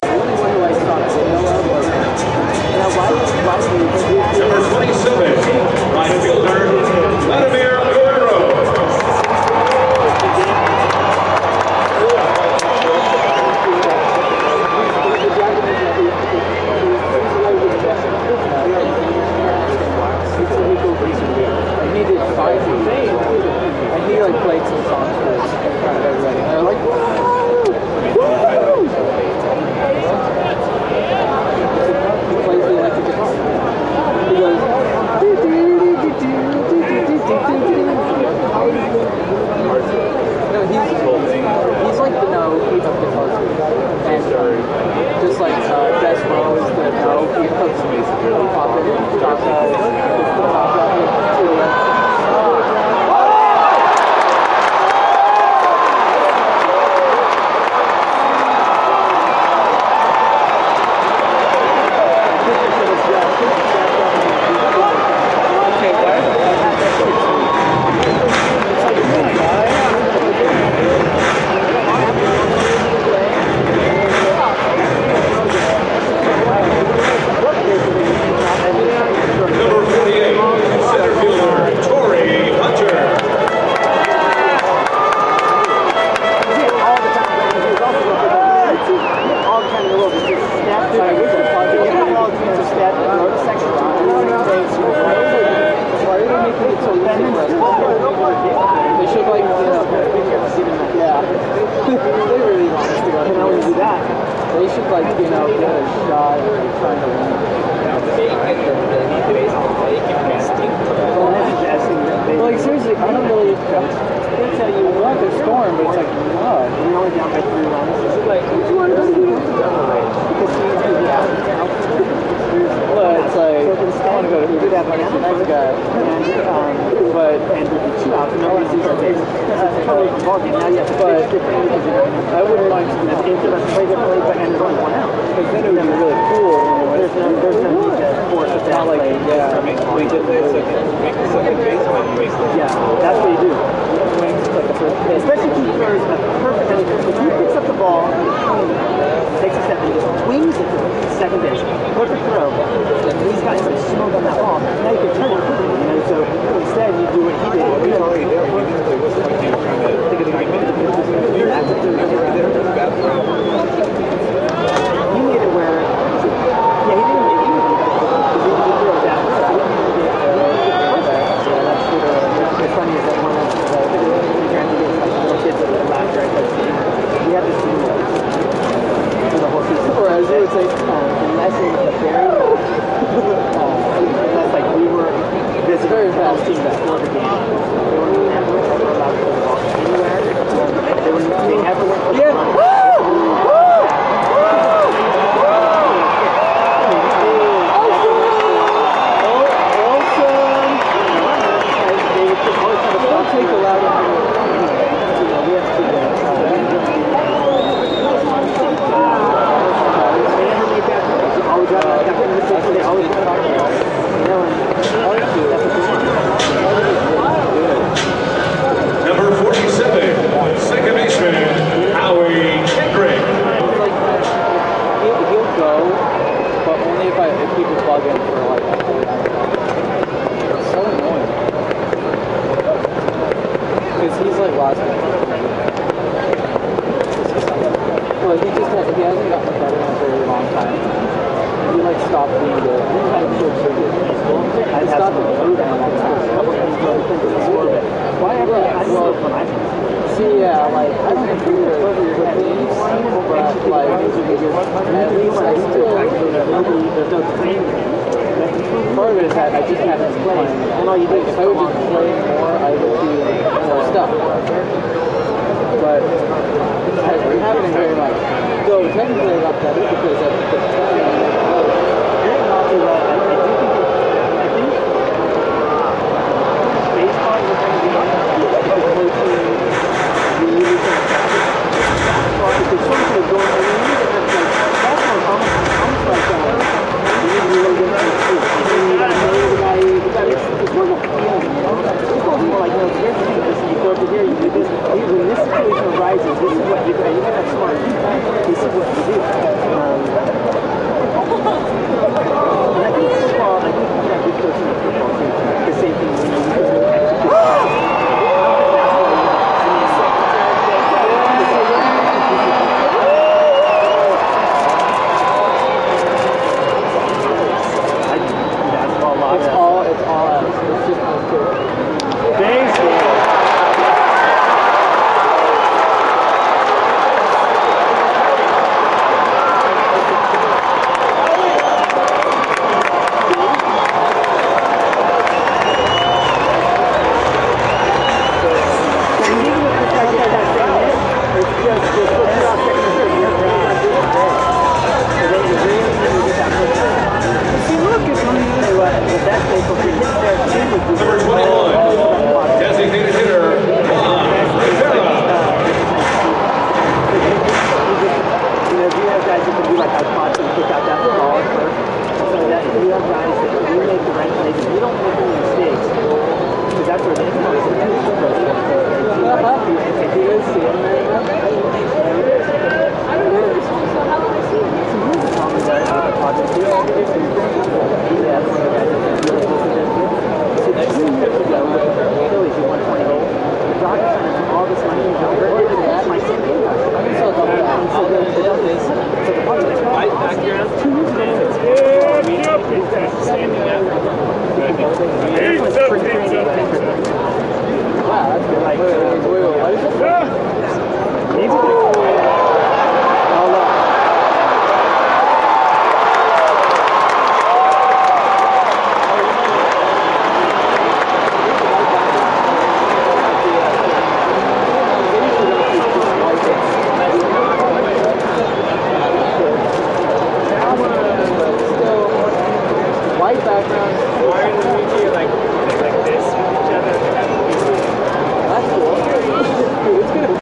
baseball sounds
This is a long track from a baseball game taken in 2008. There are several different typical professional baseball crowd sounds.
baseball, crowd, stadium, noises, crowds, ballpark